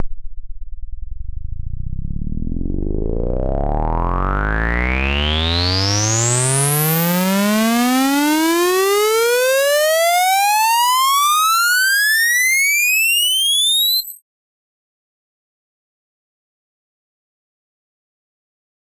Riser Pitched 01b
Riser made with Massive in Reaper. Eight bars long.
edm, synth, dance, percussion, trance